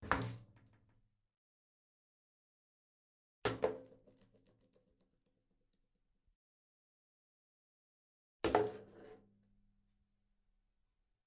17 - Empty shampoo in tub
Pansk, Czech, Panska, CZ